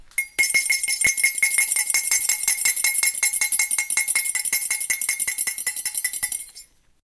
mug ringing spoon mixing
Zoom H2N xy stereo
Spoon in a mug, stirring
cup, mixing, kitchen, ringing, stirring, coffee, mug, stir, spoon, tea